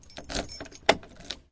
Locking the door of a Volvo 740 with the rattling of keys